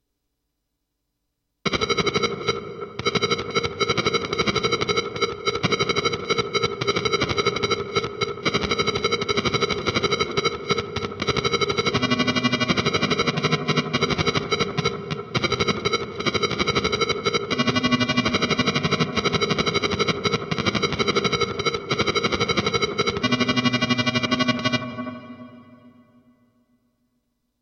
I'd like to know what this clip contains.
Glitchy Guitar
Synth-Like Sound made with guitar pedals, recorded with Cubase.
Maybe needs some time adjustment to make a cool Synth Line
Synth, Echo, Electric, Glitch